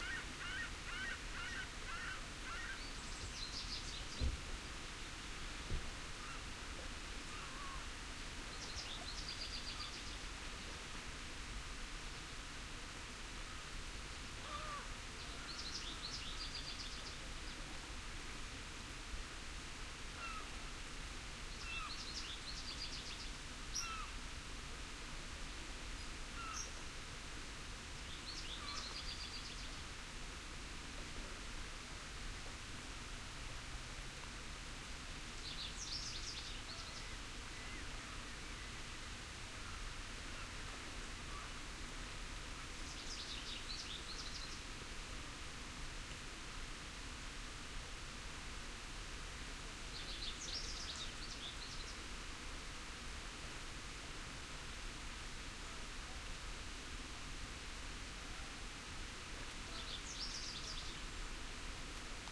20080805.birches.n.birds.01
murmur of wind on trees (birches), seagulls and other birds sing in background. Shure WL183, Fel preamp, Edirol R09 recorder. Afternoon, Forestville port, Quebec
beach, birds, field-recording, nature, summer, trees, wind